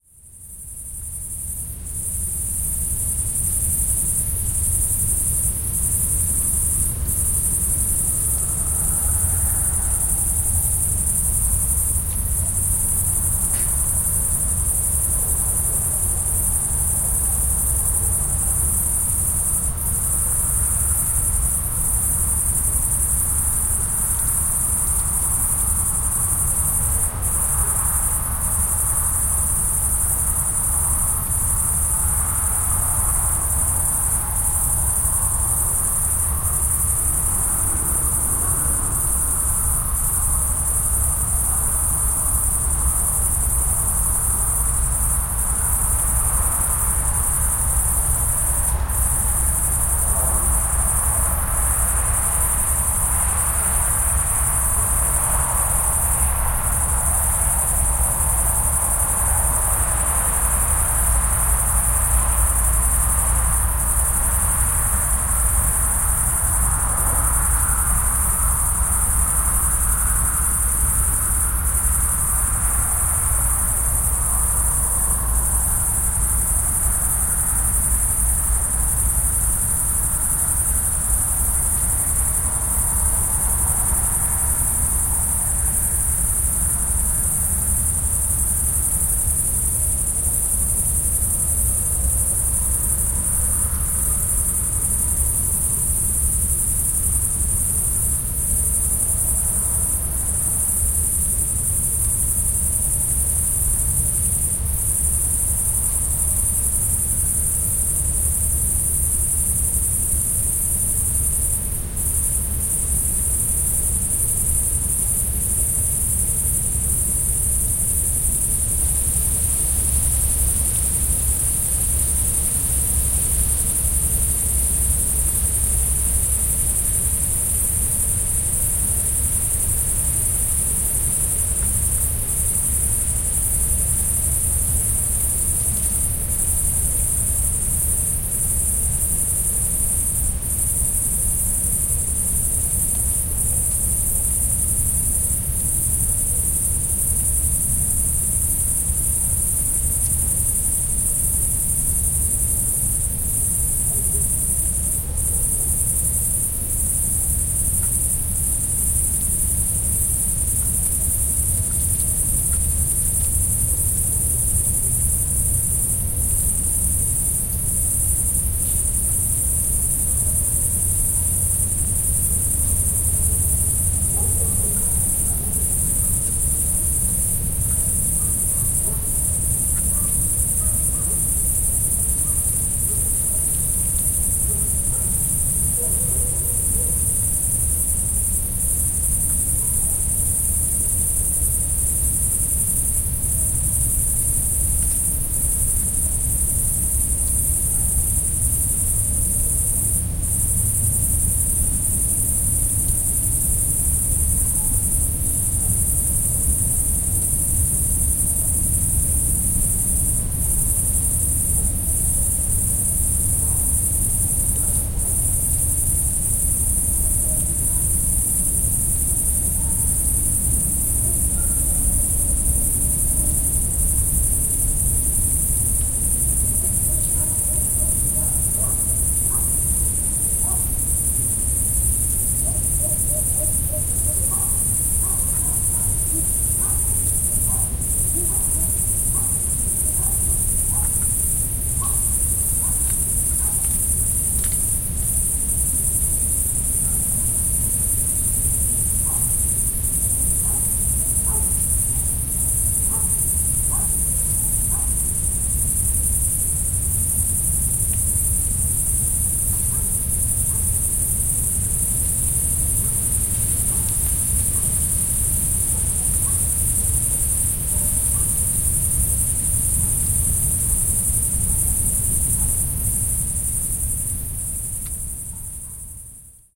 vertestolna hungary near the road 1 20080711
Recorded near the village Vértestolna on the hills. You can hear cicadas, crickets, leaves blown by the wind, our car cooling down, distant traffic and dog barking. Recorded using Rode NT4 -> custom-built Green preamp -> M-Audio MicroTrack. Added some volume, otherwise unprocessed.
car-cooling-down, cicadas, crickets, hungary, leaves, night, summer, traffic, wind